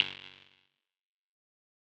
Percussive Hit 02 06
This sound is part of a series and was originally a recorded finger snap.
Recording gear:
bounce, button, drum, error, filtered, percussion, percussive, percussive-hit, spring